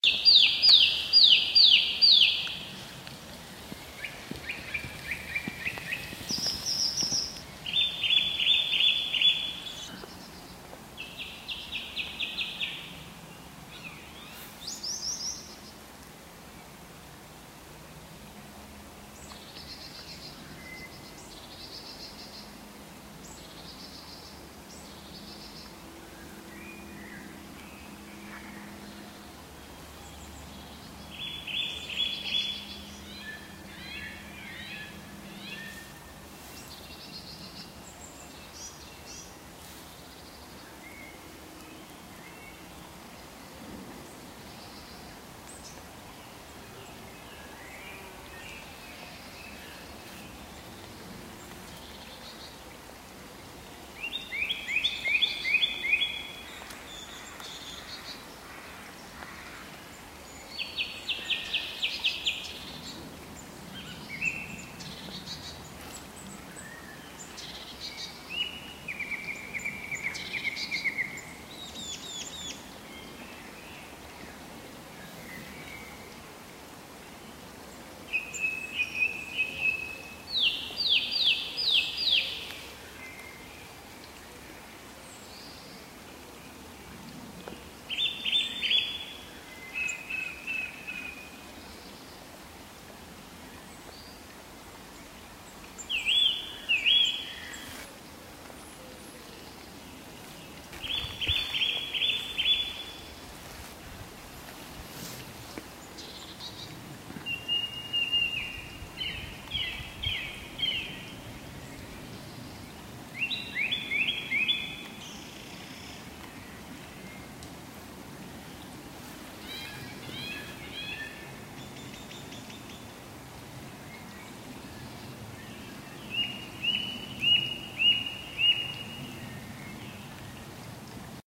birdsong in moss valley
The sound of birds including the song thrush and blackbird in Moss Valley near Sheffield.
ambiance, ambience, ambient, atmosphere, bird, birds, bird-song, birdsong, blackbird, field-recording, forest, jordanthorpe, moss-valley, nature, sheffield, song-thrush, songthrush, south-yorkshire, spring, thrush, walk, yorkshire